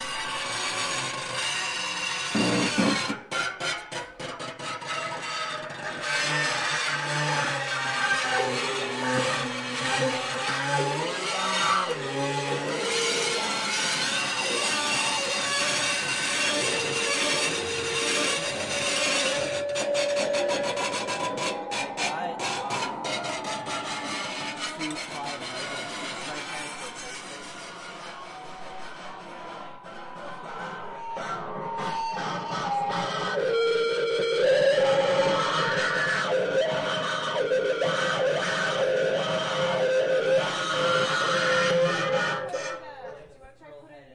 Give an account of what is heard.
Intonomuri Documentation 01
Recording of students building intonomuri instruments for an upcoming Kronos Quartet performance.
intonomuri, woodwork, workshop